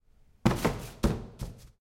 closing a bin
Sound of throwing a paper into a plastic bin with an opening of two sides.
Sounds as a door slamming and opening again several times.
bin, door-bin, campus-upf, UPF-CS13